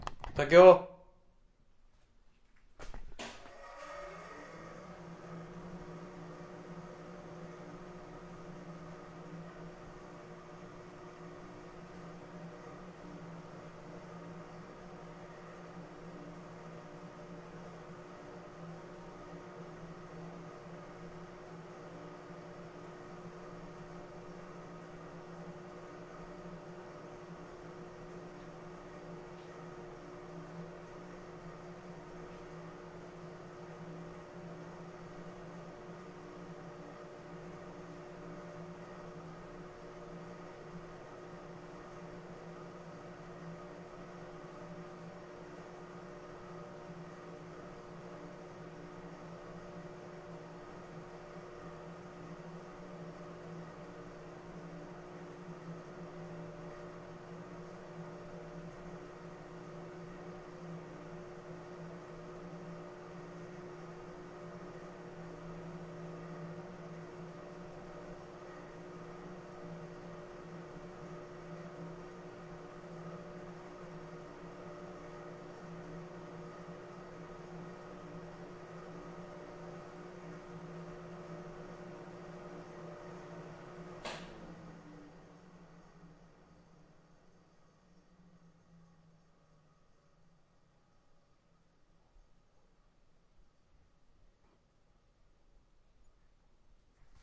ventilatior far
Recorded on SONY PCM-D50 in underground bunker of civil defense. Vsetin City, Club Vesmir. Czech Republic
Aleff